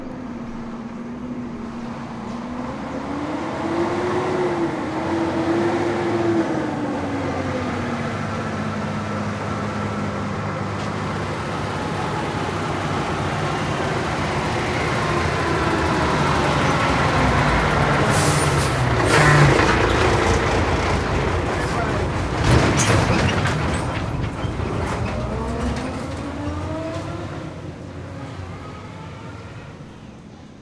truck semi trailer approach slow to stop turn and pull away slow heavy rattly diesel
truck semi trailer approach slow to stop turn pull away slow heavy rattly diesel
diesel, pull, rattly, stop, trailer, truck, turn